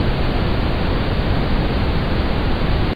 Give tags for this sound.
white-noise background-sound tv-noise ambient ambience noise atmosphere background general-noise

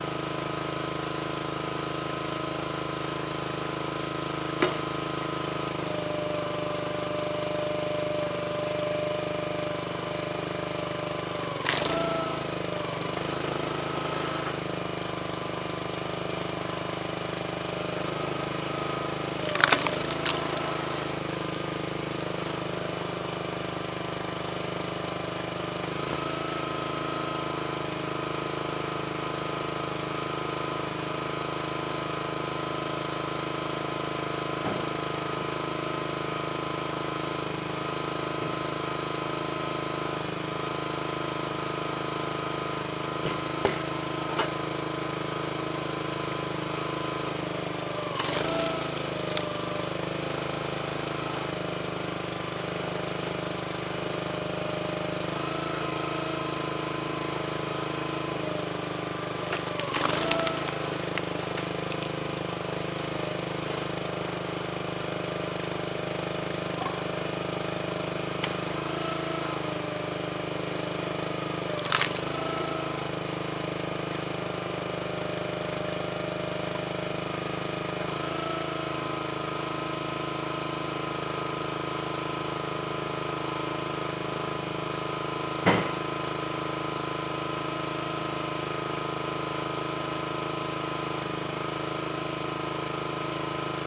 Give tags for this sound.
log wood engine pull start split mower small lawn splitter

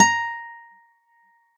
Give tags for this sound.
1-shot acoustic guitar multisample velocity

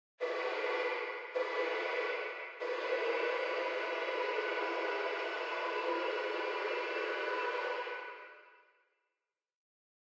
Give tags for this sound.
Grinder Horror Metal Metallic Noise Scrape

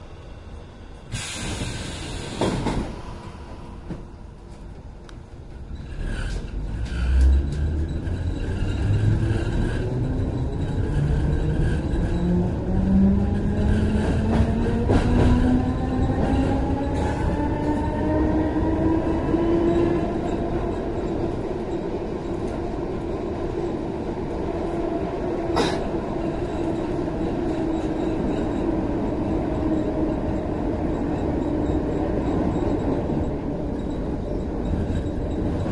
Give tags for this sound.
field-recording machines ambiance

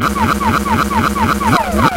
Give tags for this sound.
speedcore hardstyle lars

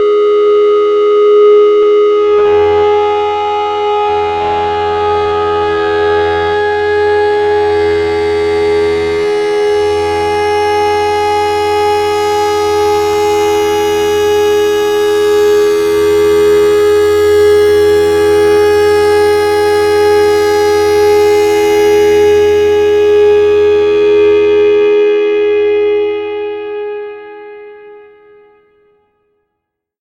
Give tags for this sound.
harsh hard distorted multi-sample solo lead

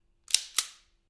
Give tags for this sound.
pistol reload

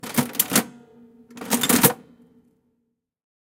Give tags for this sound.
adding antique calculator crank factory hand industrial machine machinery mechanical office pull pulling robotic vintage

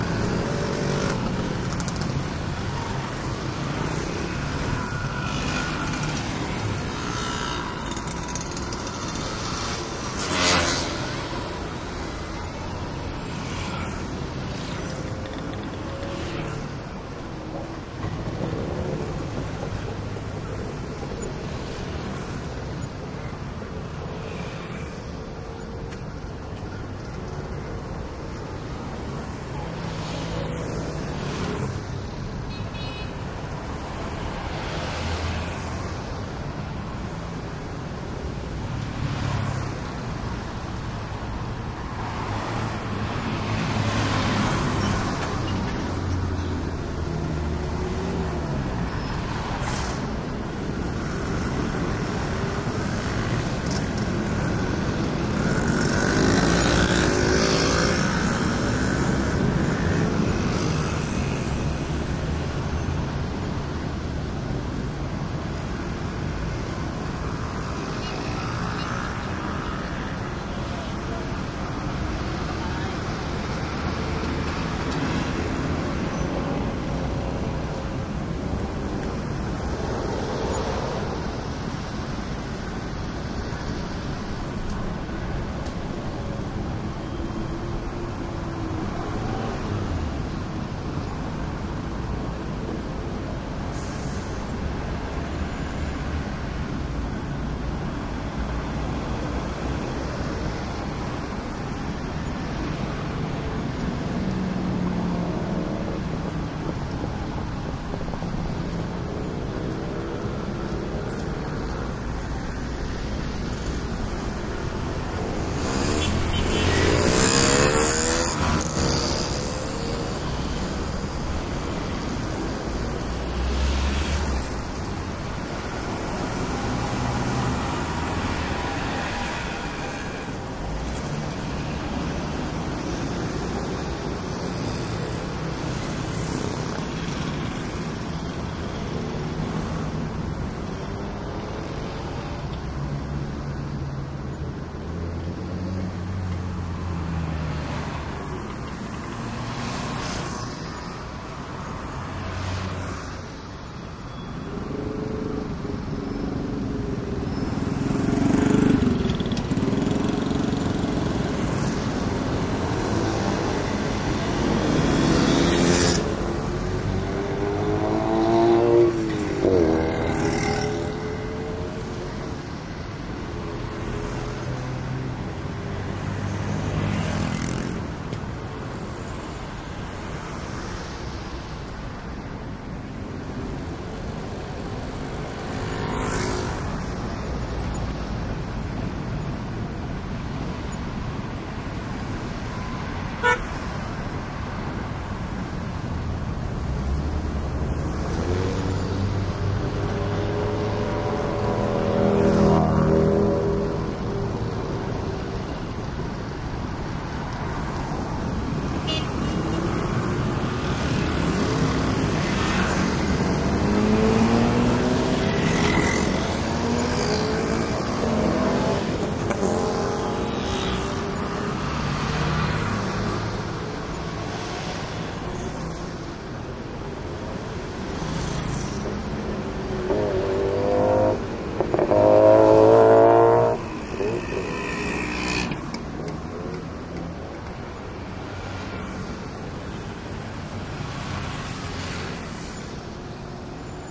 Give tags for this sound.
Bangkok mopeds motorcycles traffic Thailand field-recording

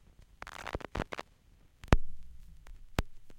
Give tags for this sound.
noise static record hiss pop dust

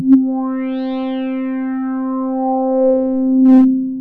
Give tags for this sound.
free little-allen multisample sample sound subtractive synthesis tractor-beam trippy

